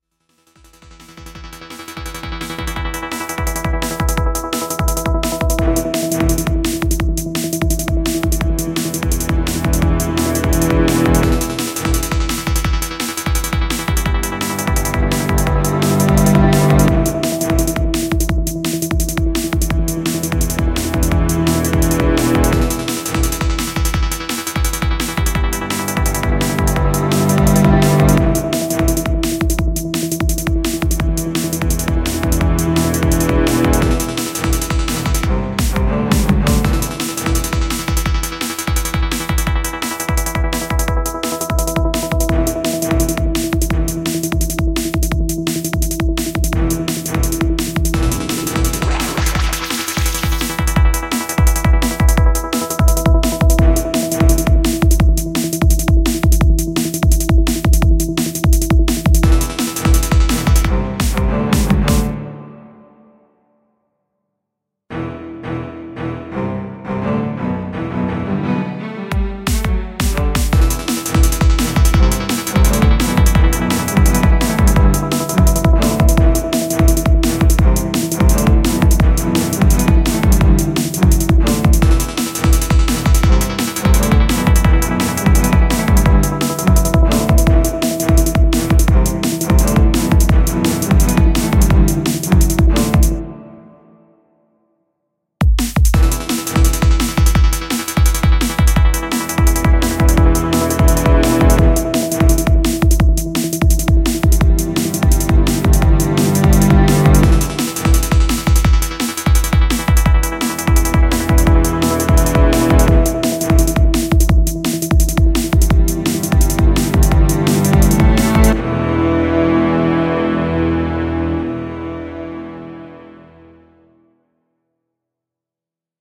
Cyber Race
I created this song using Soundtrap. It's basically a song that can be used in a race game.
music, techno, race, game